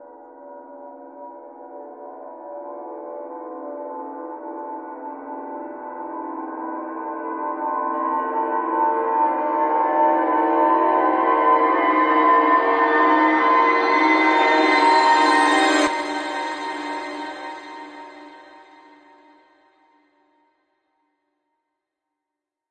Processed Sitar Riser
Created by recording a session of a sitar with various effects applied that greatly changed the character of the instrument.
I took one part of the session, reversed it, and layered several copies of itself with each duplicate being higher in pitch. Finished it off with some high-end reverb.
stinger, processed, sitar, creepy, sinister, suspense, terror, score, film, ambient, riser, spooky, dark, transition, soundesign